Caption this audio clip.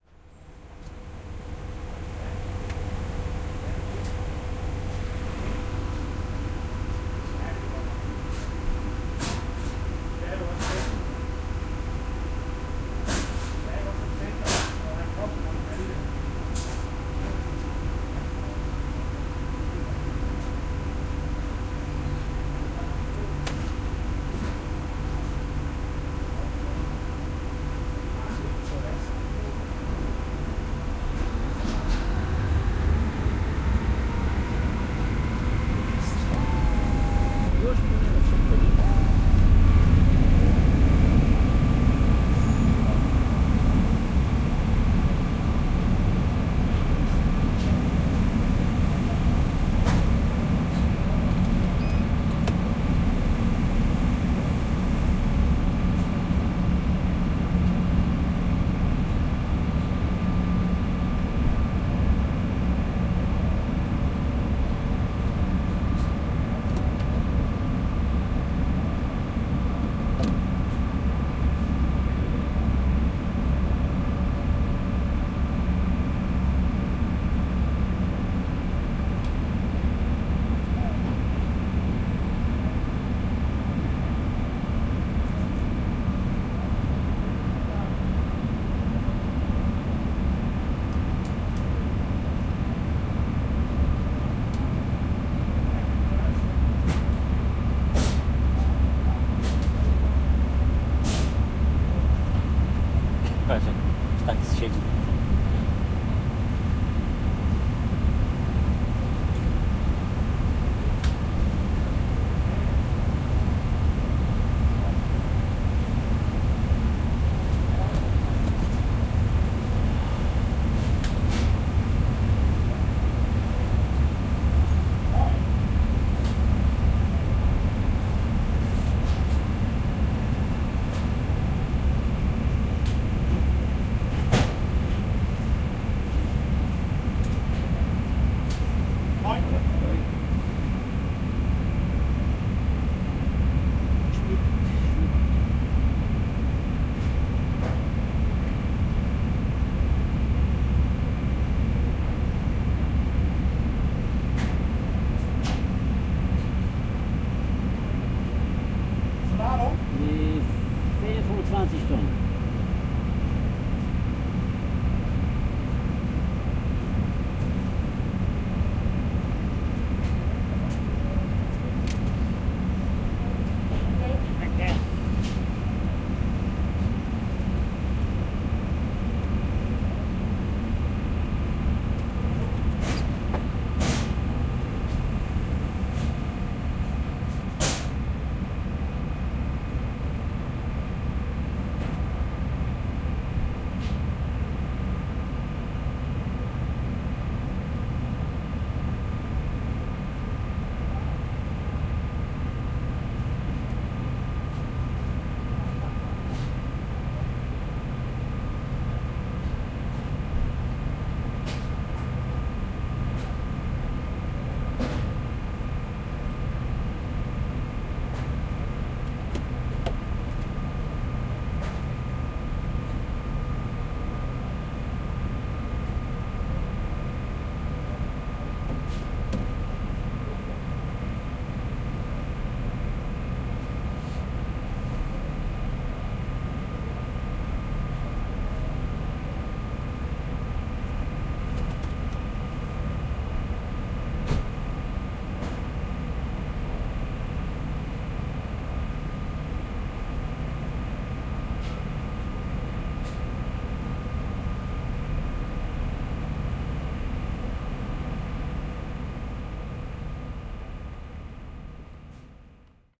110801-cross the elba river by ferry
01.08.11: the second day of my research on truck drivers culture. the start the ferry on the Elba river (from Glückstadt to Wischhafen). Recording made inside the truck cab. Sounds of the ferry's engine, some talks, comments.
field-recording, people, voices